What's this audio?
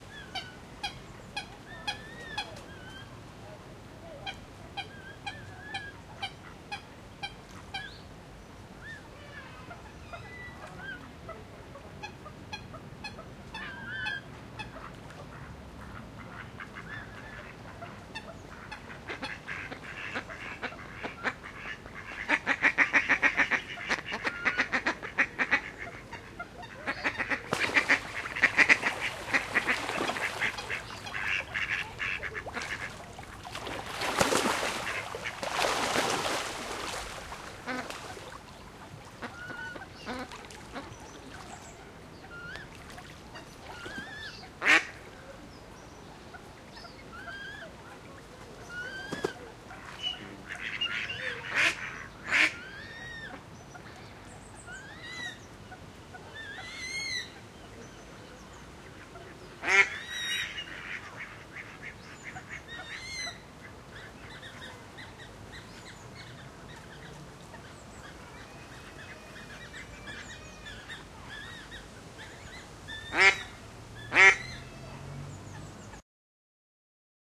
Woodberry Wetland — Ambience
A recording at Woodberry Wetland, Hackney. Used a Zoom h1n.
field-recording, nature, coots, London, ducks, birds